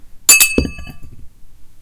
Dropped and threw some 3.5" hard disk platters in various ways.
ting impact and wobble